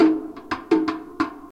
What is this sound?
Homemade Drumloop 011
dooty, mbop, sanfransisco-chupacabra, uhhhhhhh